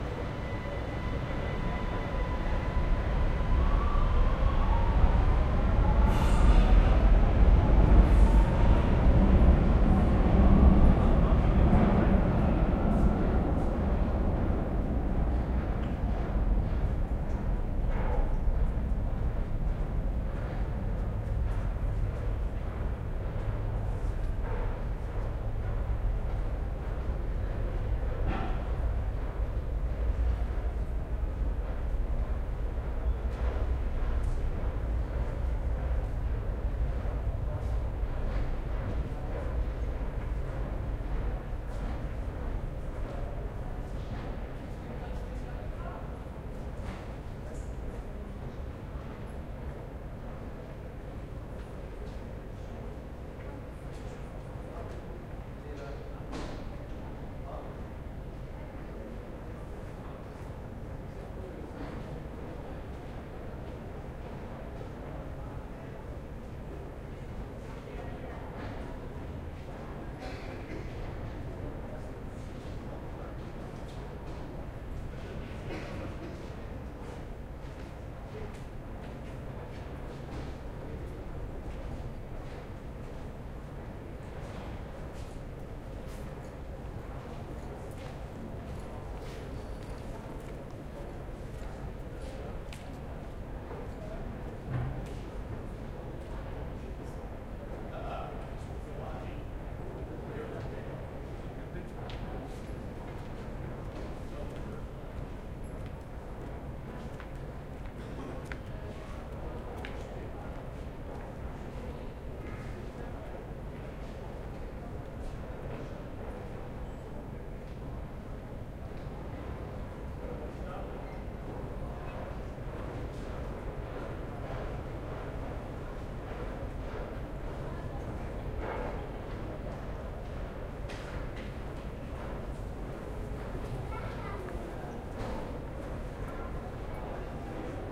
ambience Vienna underground station train leave people walk
Ambience recording of an underground station in Vienna. A train leaves the station and people are walking around.
Recorded with the Zoom H4n.
ambience, atmosphere, field-recording, leave, metro, people, station, subway, train, underground, walk